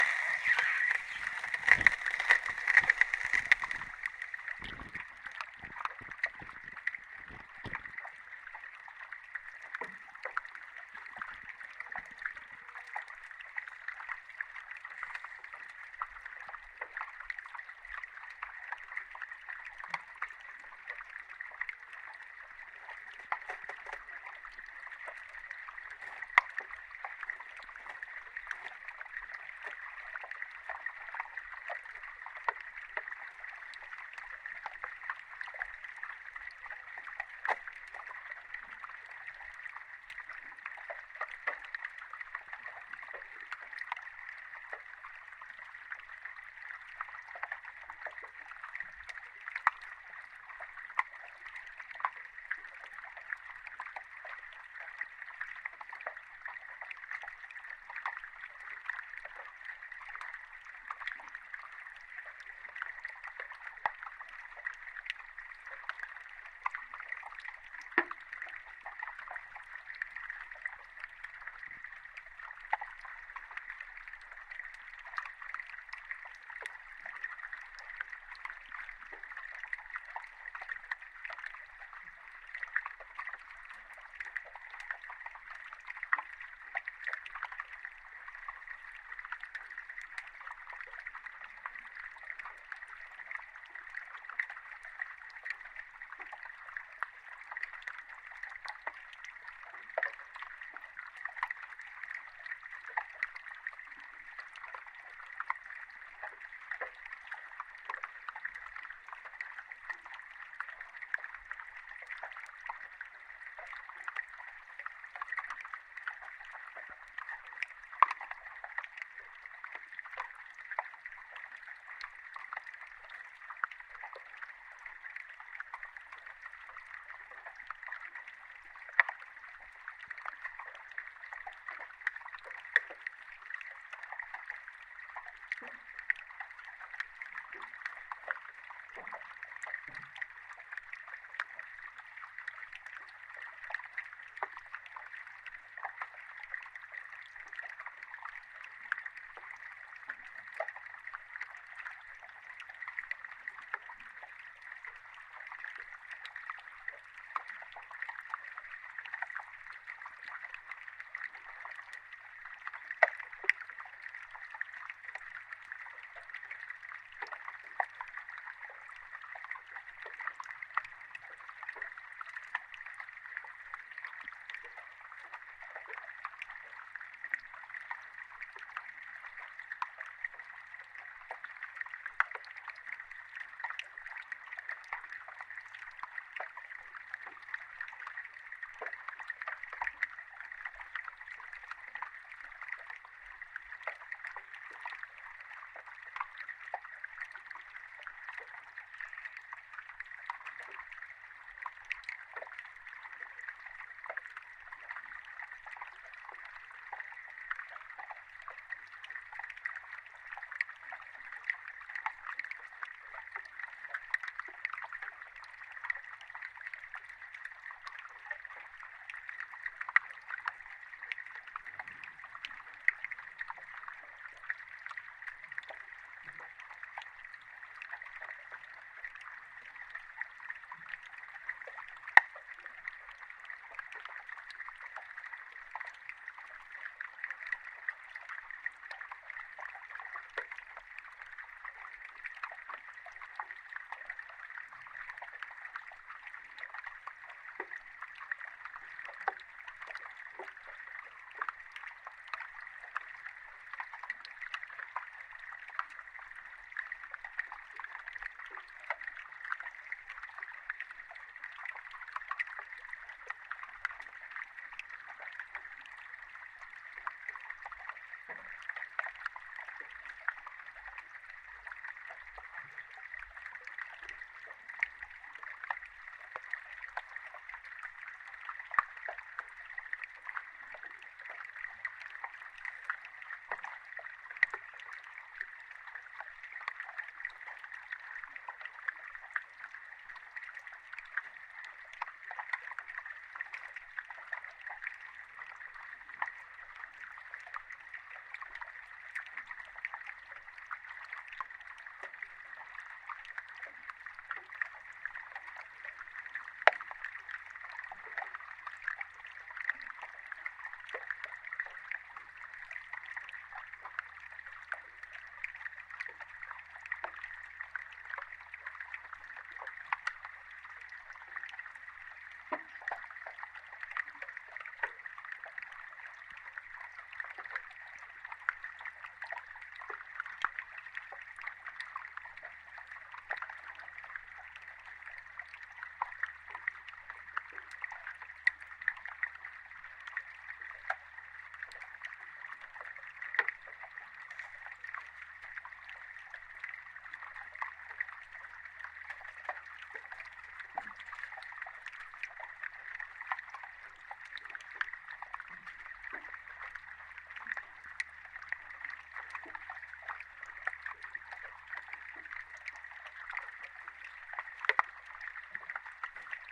Glacial Lagoon Jökulsárlón
Hydrophone recording of the Jökulsárlón glacial lagoon in Vatnajökull National Park, Iceland. Recorded July 2014.